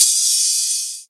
drum hits processed to sound like an 808